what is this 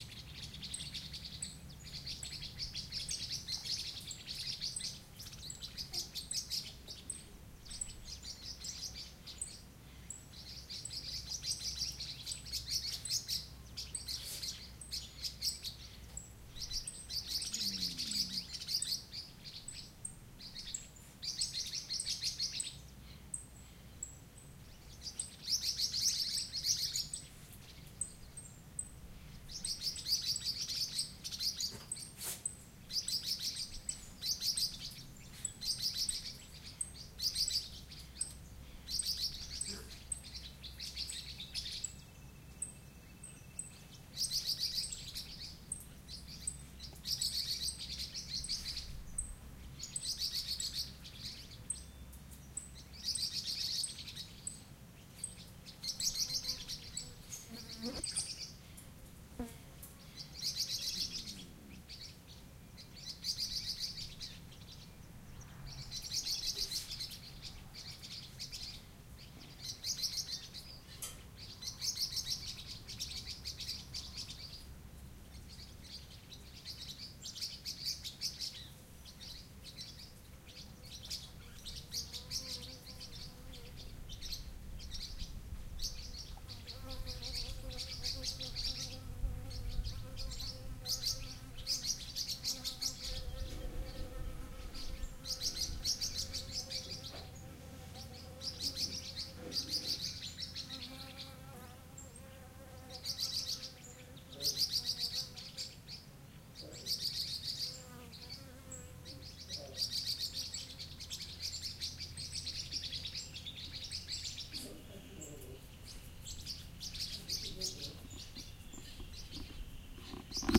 Early Morning Birds at a Fazenda in Goiás, Brazil
Field recording of various birds at daybreak on a rural fazenda (farm) in Goiás, Brazil. The natural chorus of bird calls captures the tranquility and richness of the Brazilian countryside at sunrise.
recording, sunrise, rural, fazenda, morning, Goias, ambient, dawn, tropical, calls, birds, farm, field, bird, nature, Brazil